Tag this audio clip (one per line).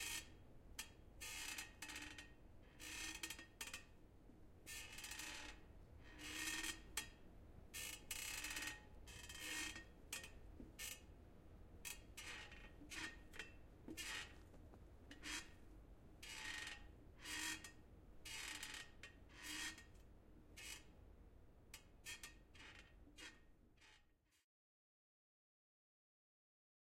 Creeking
Hit
Impact
Meat-hit
Metal
Movement
Object
OWI
Screeching
Swings
Sword-metal